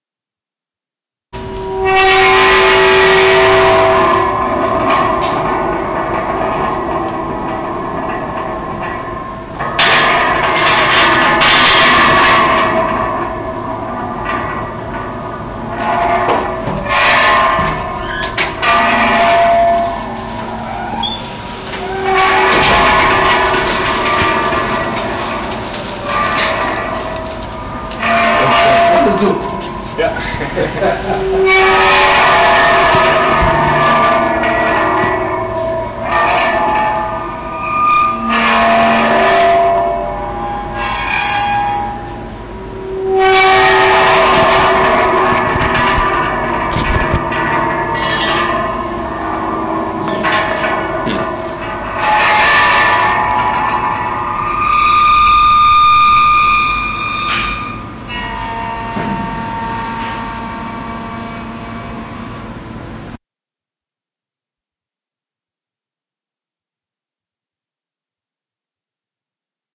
A field recording of an old metal turning door at a bicycle garage in Eindhoven.
Recorded in 2001 with a minidisk recorder (thanks to Thijs van Gerwen) and a simple microphone. The results are quite disturbing though!
loud, horror, resonance, field-recording, metal-door